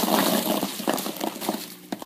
Okay, so it's ACTUALLY a bunch of styrofoam packing peanuts hitting the lid of a cardboard box, but "ball pit" was what I was going for. YMMV!